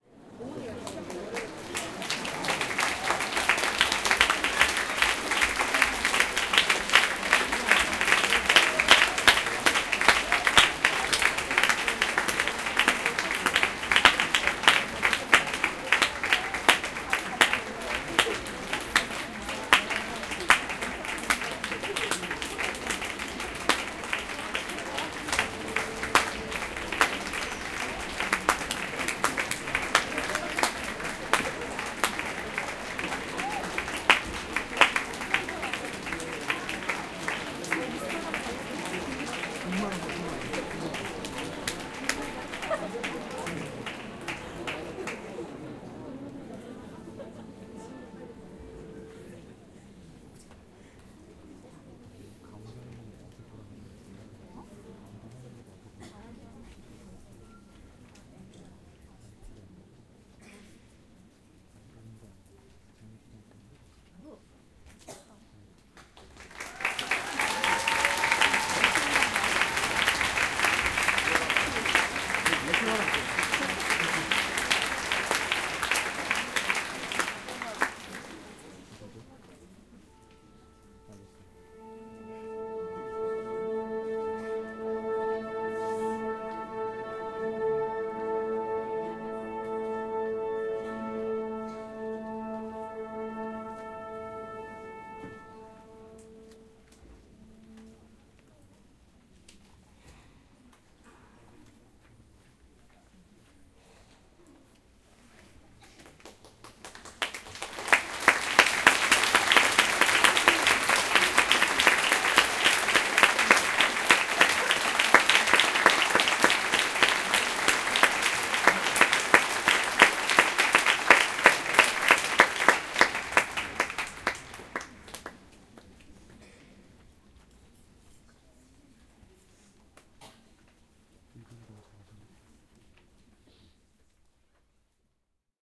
0278 Applause and tune
Applause at the beginning of classic music piece in the Concert Hall of the Seoul Arts Center. Tune the instruments.
20120606